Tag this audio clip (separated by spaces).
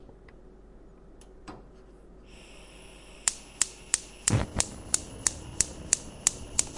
cooking domestic-sounds field-recording kitchen